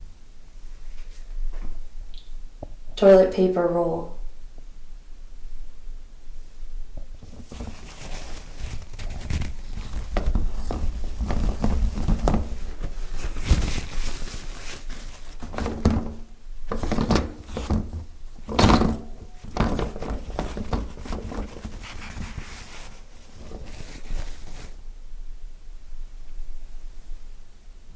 toilet paper roll
Rolling toilet paper
paper, toilet, using